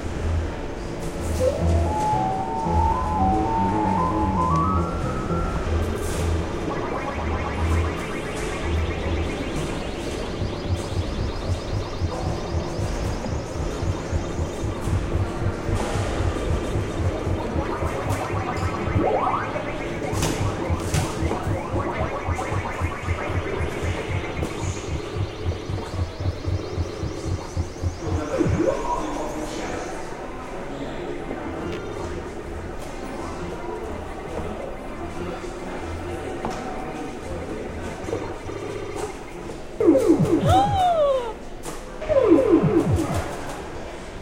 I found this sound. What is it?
Playing Ms. Pacman at the Musee Mecanique on Fisherman's Wharf in San Francisco.